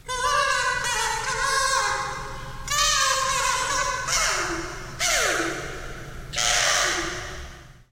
Master Kazooist Cartoon Kevin getting very forceful in front of a cheap Radio Shack clipon condenser with Cooledit effects.
free, improv, kazoo, sample, sound